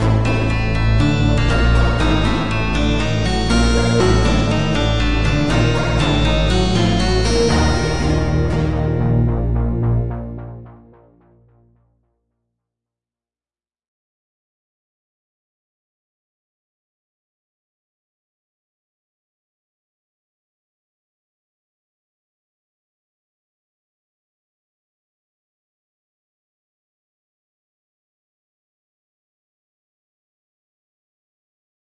short loops 31 01 2015 c 3
game, gameloop, short